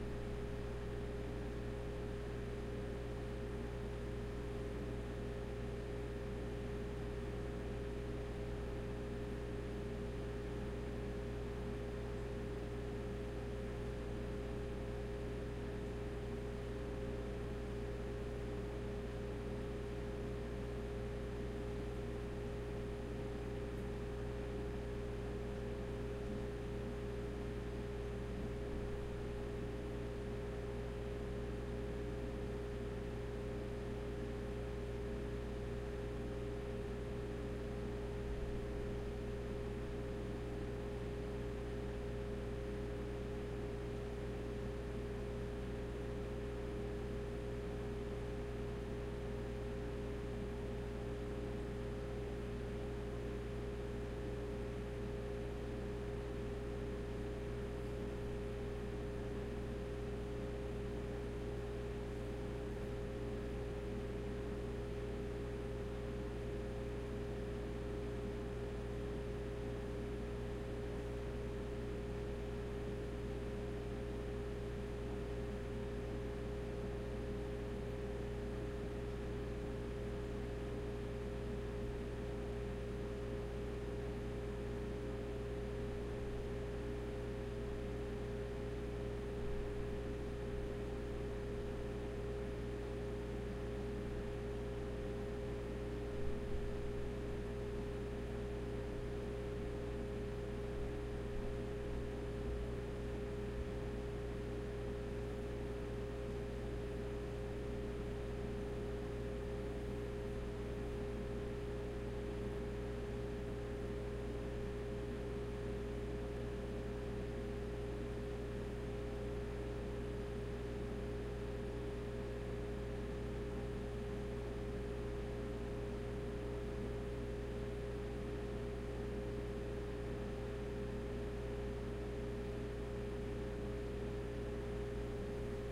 room tone kitchen fridge Casgrain
tone, room